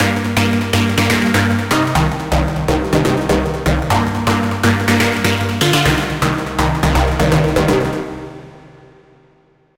sydance6 123bpm
background, beat, club, dance, dancing, disco, dj, drop, instrumental, interlude, jingle, mix, music, part, podcast, radio, sample, sound, stabs, stereo, techno, trailer